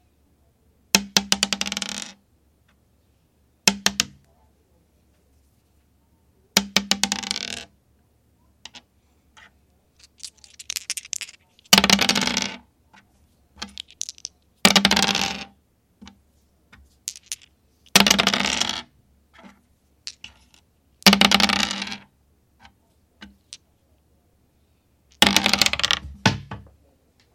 Dice Rolling
Rolling one and then two standard 6 sided dice onto a wooden table.